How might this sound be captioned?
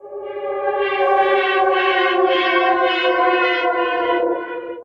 Melting Drain
Great melting sound effect or even used as a Nightmare Siren.
80s, 90s, Drain, Effects, Horror, Nightmare, Sci-Fi, SFX, Siren, Sound-Effect, Synthetic